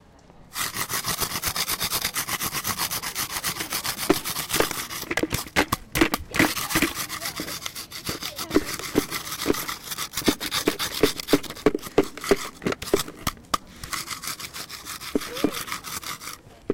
SonicSnap SASP PolJoanEulalia
Field recordings from Santa Anna school (Barcelona) and its surroundings, made by the students of 5th and 6th grade.
5th-grade, spain, sonicsnaps, cityrings, santa-anna